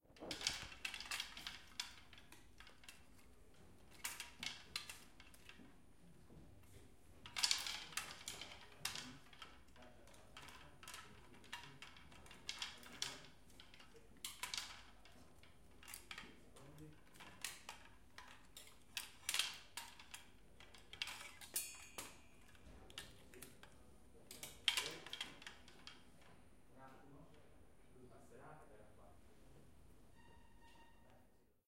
Hanger wardrobe
foley, Hanger, wardrobe